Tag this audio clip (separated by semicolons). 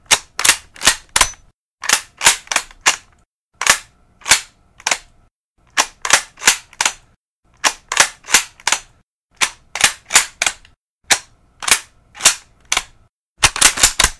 Bolt; Nagant; Action; Reload; Load; Mosin; Rifle